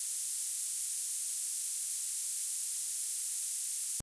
Four Seconds Of Perfect White Noise
Exactly four seconds of absolutely flawless, clean white noise. Made in Sylenth1 in FL Studio 20.
ambiance, ambience, ambient, atmos, atmosphere, atmospheric, background, background-sound, brown, brown-noise, drone, fx, general-noise, noise, perfect, pink, pink-noise, sfx, soundscape, special, white, white-noise